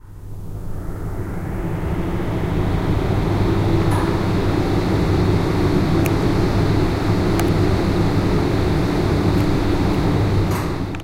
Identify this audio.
sound of air conditioningin a room from the moment it is turn on until it is shutdown. Recorded with a tape recorder in a room of the library / CRAI Pompeu Fabra University.
campus-upf, library, UPF-CS14